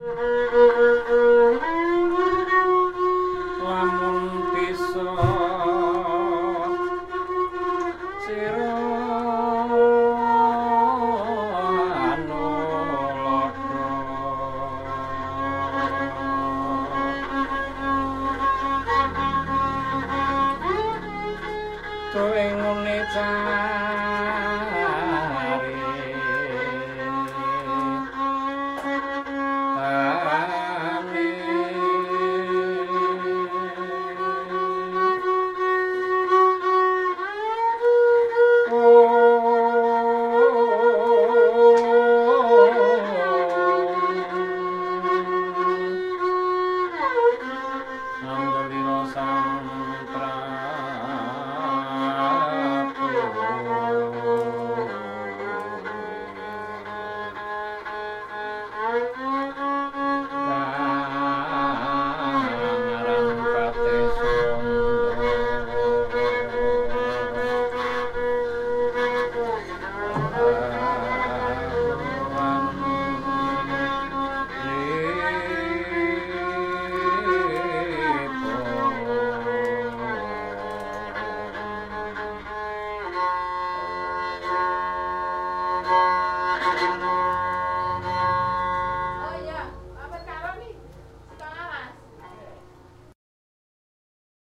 Javanese Rebab Music - Indonesia
A Javanese musician plays a local folk song on the rebab. Recorded in a village outside Borobudur, Java, Indonesia.